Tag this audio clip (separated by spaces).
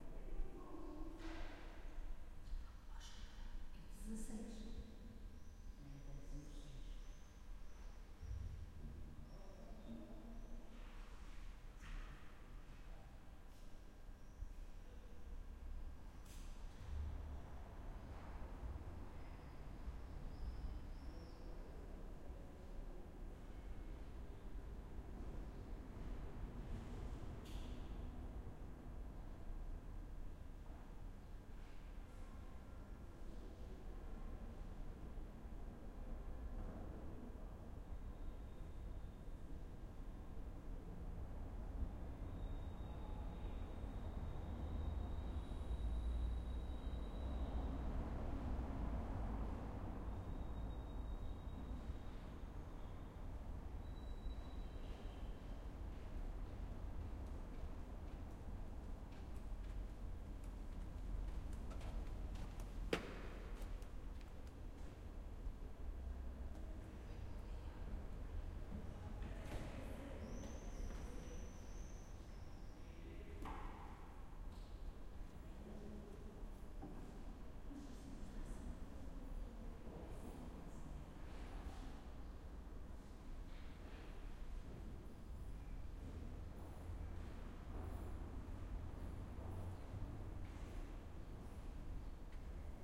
porto field-recording a athmosphere morning smc2009 inside church